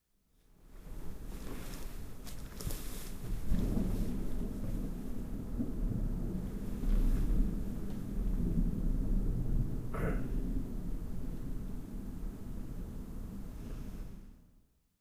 One of the 14 thunder that were recorded one night during my sleep as I switched on my Edirol-R09 when I went to bed. This one is quiet far away. The other sound is the usual urban noise at night or early in the morning and the continuously pumping waterpumps in the pumping station next to my house.
human, field-recording, rain, breath, body, thunderstorm, thunder, bed